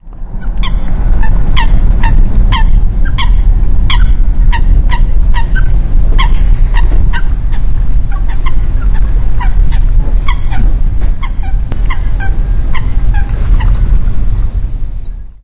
fotja aguait del sabogal
The sound of a coot. Recorded with a Zoom H1 recorder.